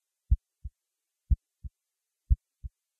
Heart 1.0Hz
Heart Sound FX with 1.0Hz.
Created with Cubase 6.5.
sound, soundtrack, heart, fx, synth